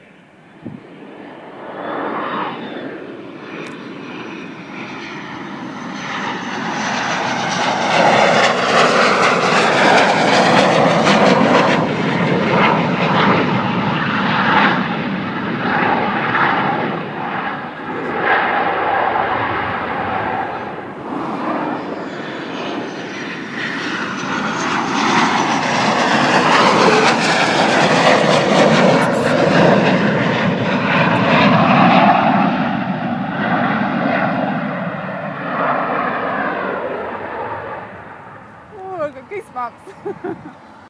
An FA18 aircraft coming in to land.